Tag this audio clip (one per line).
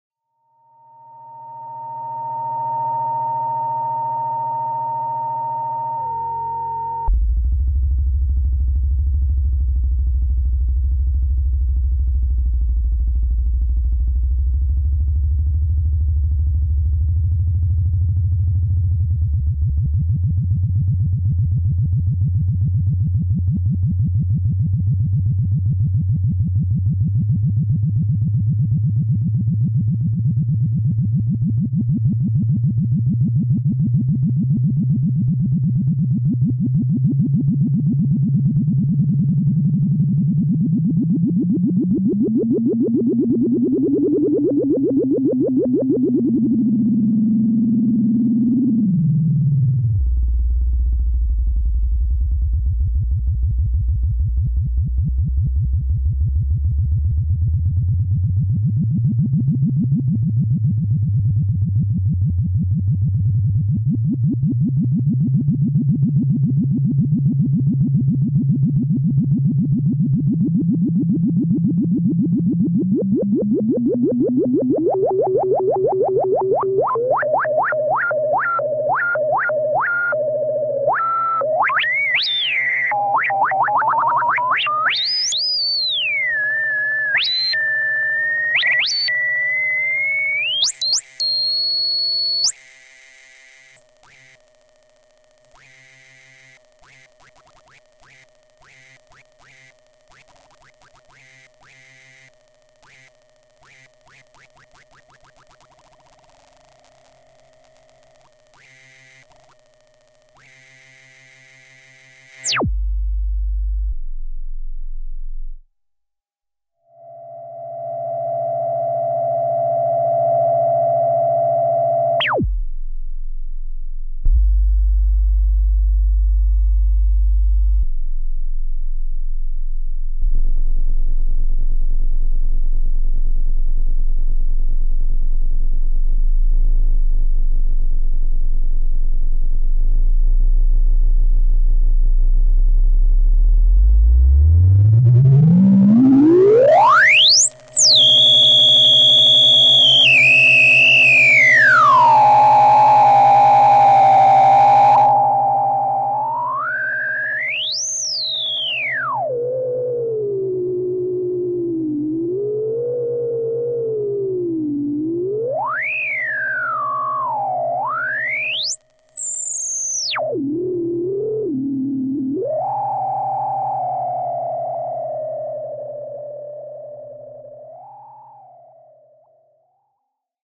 submarine
analog
telemetry
old
sine
scifi
circuits
vintage
frequencies
retro
electronic
oscillator
wave
sci-fi